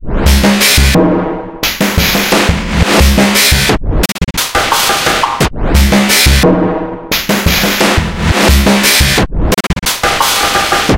DL BA019 175
Processed Drumloop with glitch effect (175 bpm)
drumloop, acoustic, bpm, drum, 175, distortion, glitch, beat